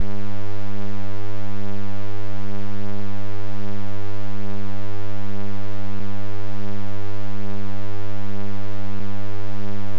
2 Osc slight Detune lead
Sample I using a Monotron.
Oscillater, Lead, Synth, Sample, Bass, Monotron